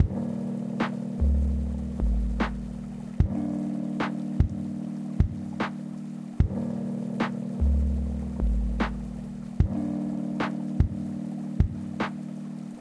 Another relaxing lofi beat to study to.
drum, garbage, percussion-loop